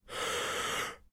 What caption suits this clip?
breaths solo10
Clean sample of a person breathing in rapidly, lot of air, 'shock-reaction.Recorded with behringer B1
air, breath, noise, shock, shocked, suspense, tension, wind